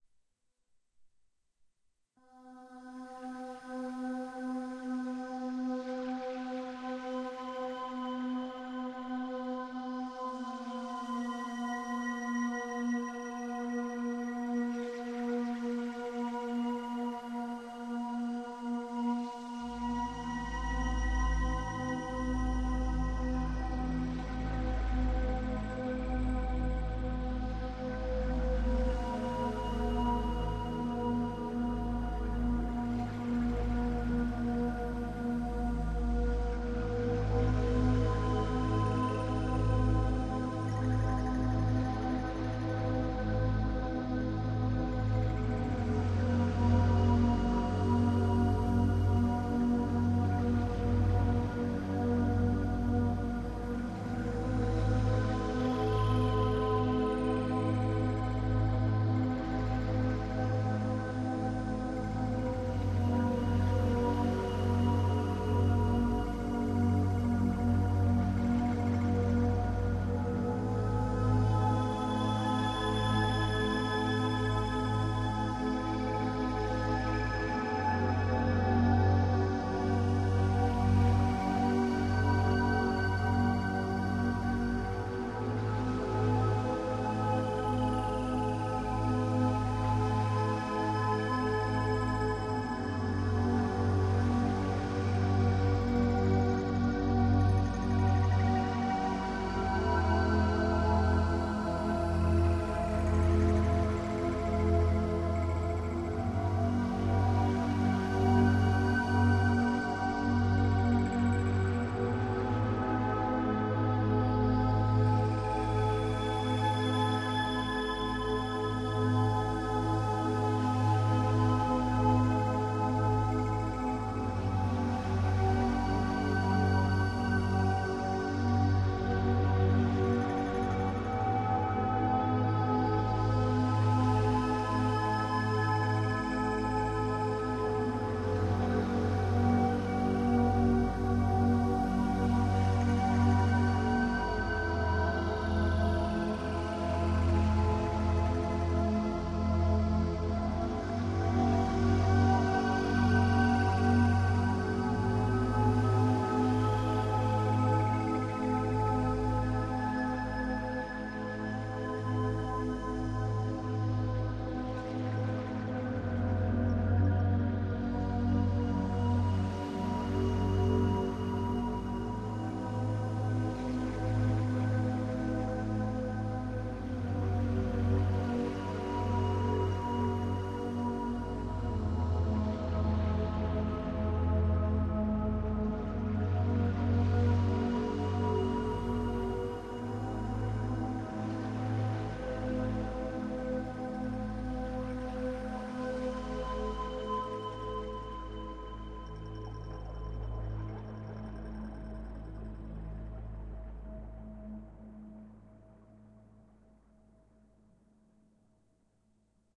relaxation music #15
Relaxation Music for multiple purposes created by using a synthesizer and recorded with Magix studio.
Like it?
meditative, peacefull, relaxation, relaxing, soothing